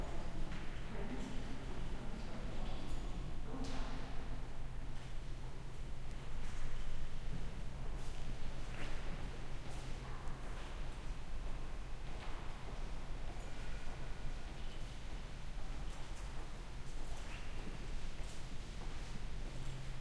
Museum Gallery 2, Subdued Chatter
airy, ambience, art, chatter, echo, gallery, museum, people, room, tone